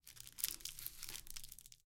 Meat being cut up with a knife.